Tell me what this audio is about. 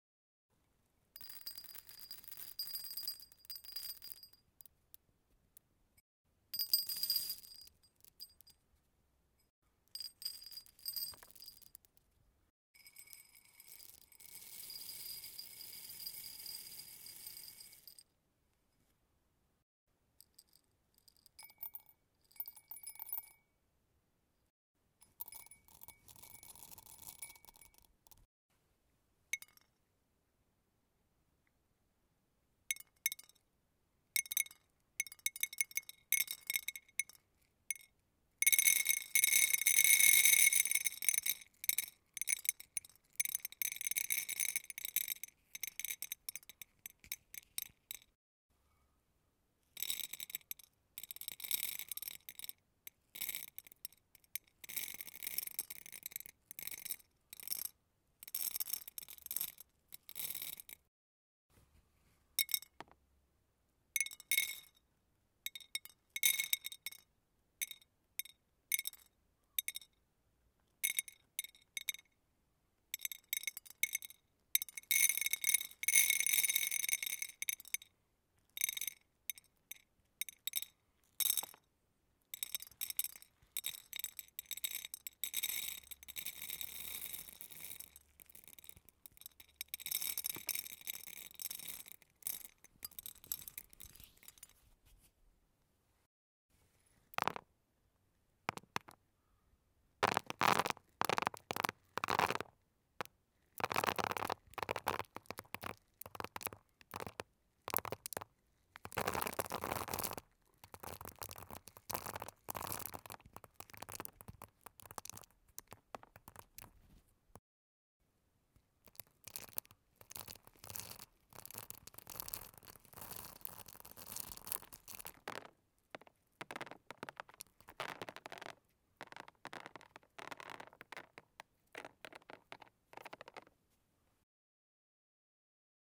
arroz cayendo
drop, arroz, ceramica, vaso, close-up, rice, tela